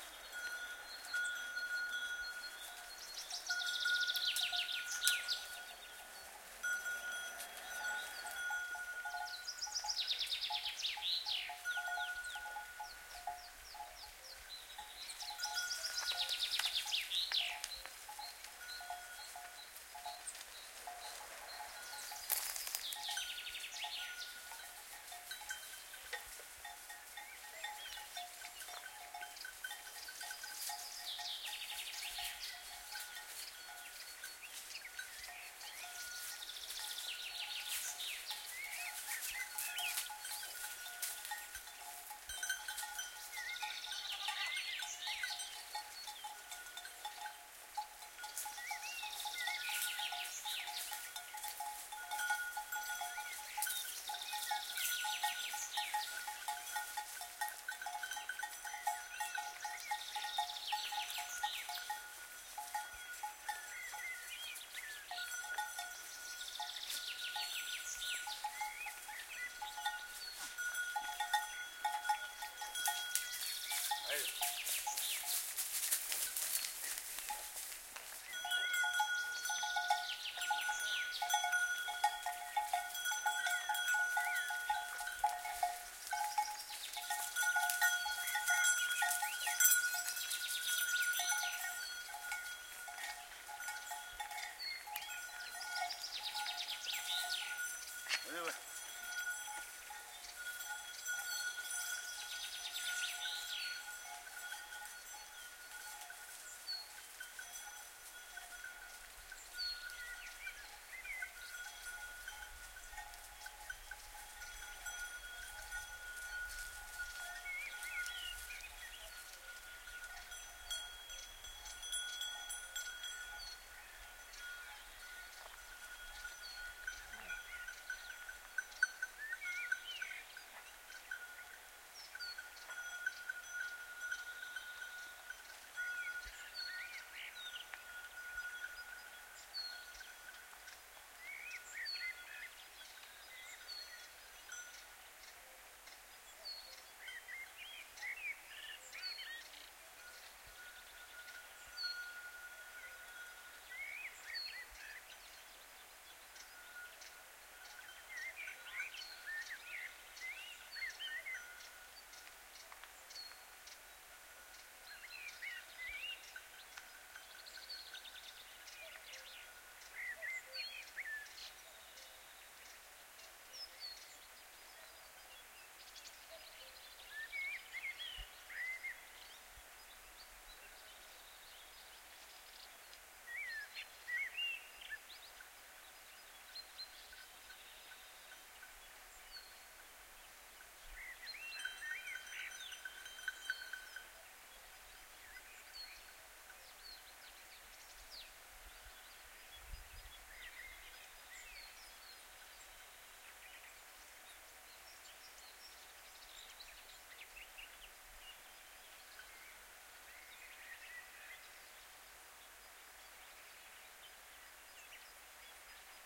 Nature sounds, bells, goats, cow, birds - Kardzhali, Bulgaria
The sound of goats with bells on their neck grazing in trough the mountains of village Studen Kladenets in the Kardzhali Province in southern Bulgaria. Also you can hear a cow with a wooden bell, some footsteps in the grass and birds.
Recorded with Zoom H-1.
soundscape, wooden, bells, goat, ambience, spring, goats, ambiance, cow, ambient, nature, field-recording, field, birds, moutain